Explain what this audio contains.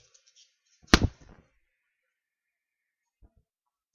Great Punch #2
This is a Really Awesome Punch sound effect, use it baby! Also look up "Punch Hard" Which is a great punch sound effect I made that is arguably better!
Great, Brutal, Punch, Hard